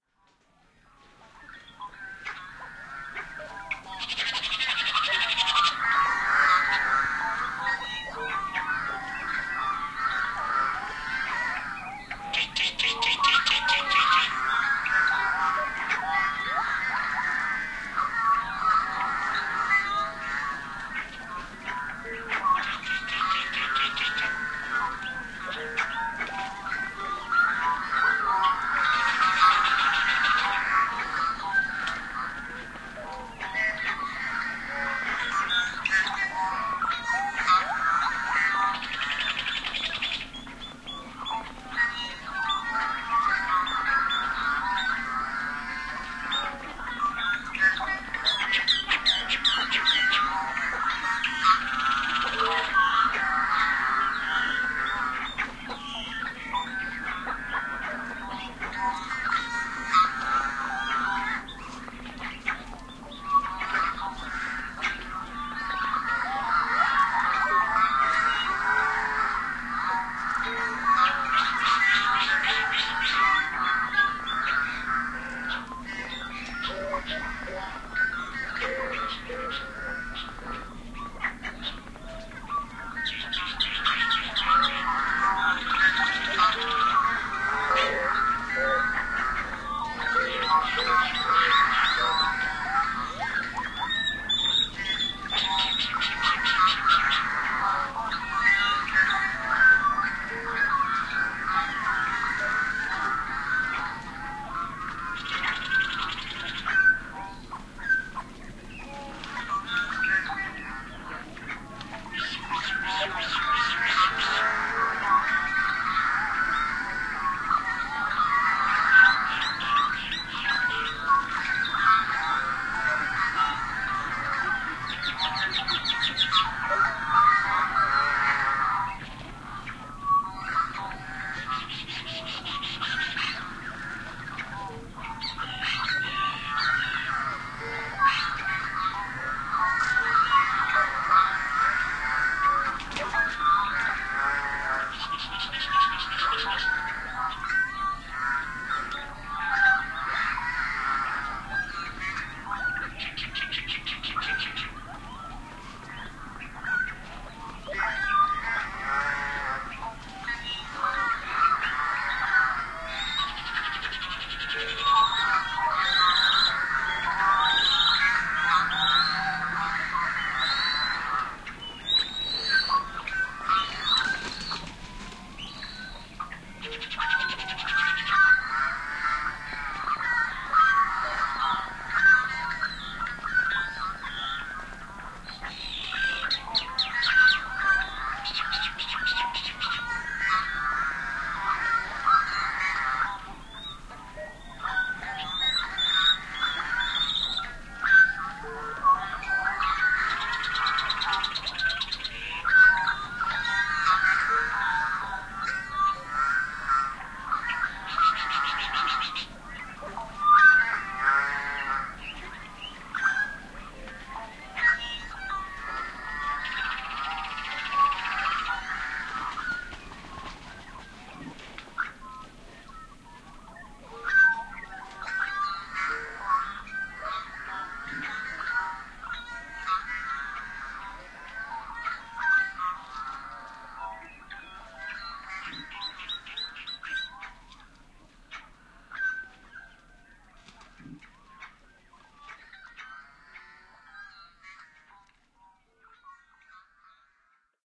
This recording was done March 10th, 2009, on Sherman Island, California. Playback is at half-speed.